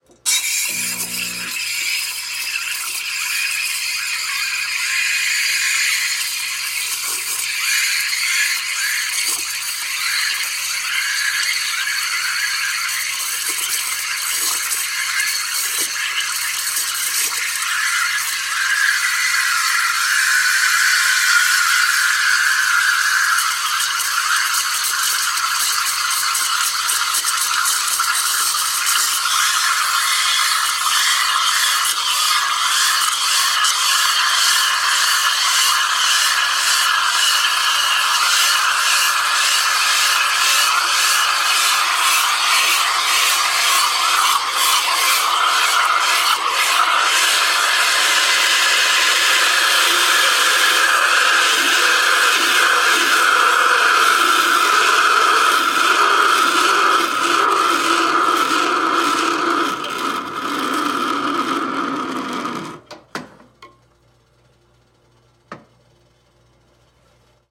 Cappuccino coffee steamer throating. - 01-04
espresso machine steaming or frothing milk, (was ment for a malfunction machine in the movie)
steam-wand, espresso-machine